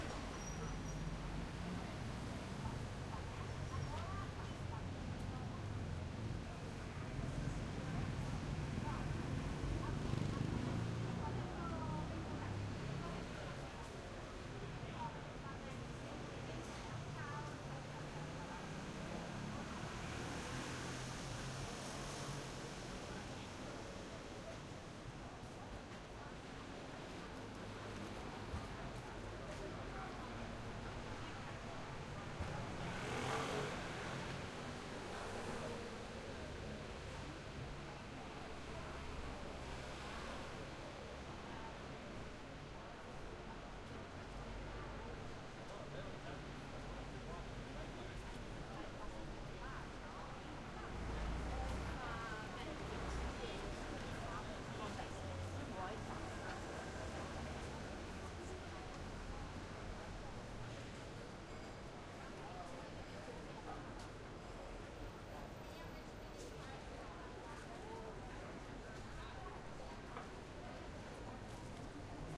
081016 00 soundscape trieste
soundscape from the street in trieste, italy
soundscape, trieste